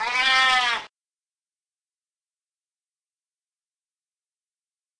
Siamese cat meow 5
animals, cat, meow, siamese